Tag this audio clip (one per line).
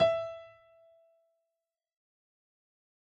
octave6
piano